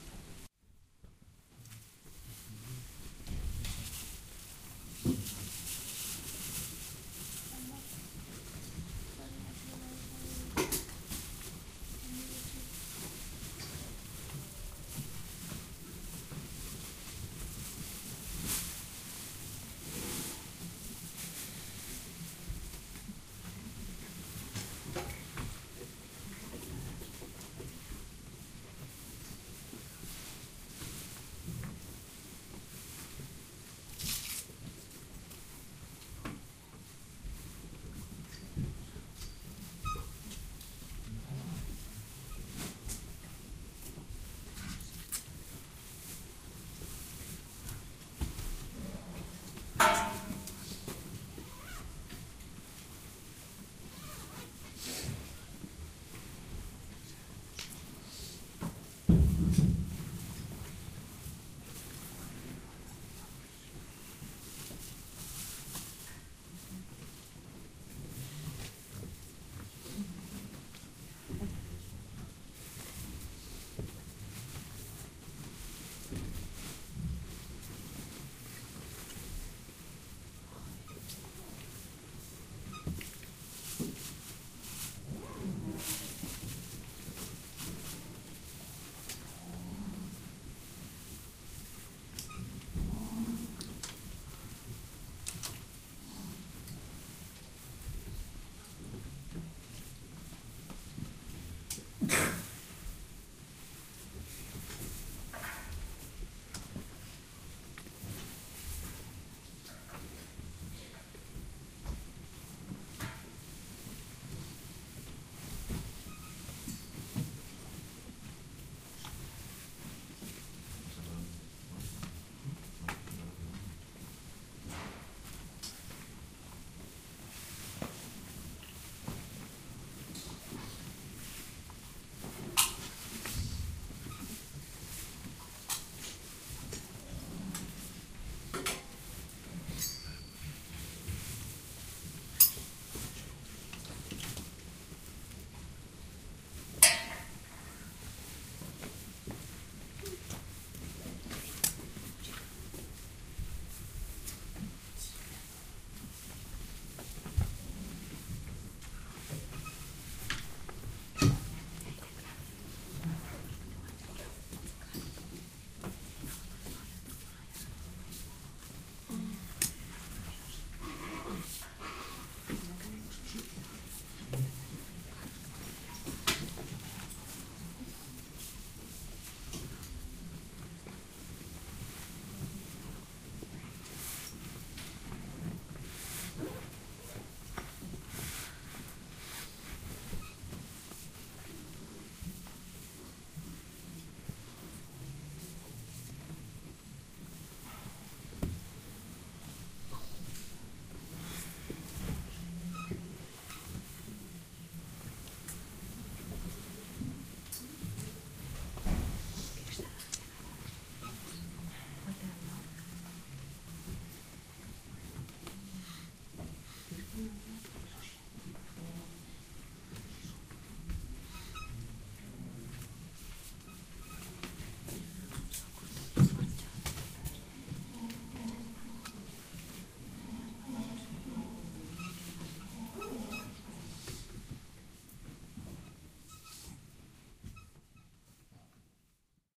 Albergue Municipal de Portomarín, 5:45AM
31/7/2011 - Second day
Peregrinos waking up and preparing silently before starting another walking day. This is another quite typical sound of Camino de Santiago. People is waking up quite early (while you still try to sleep) and making all kind of soft noises with plastic bags, zips...
This recording was made with a Zoom H4n.